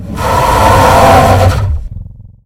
Creature Scream
monster, scary, horror, roar